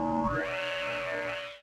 sound of my yamaha CS40M
sound, fx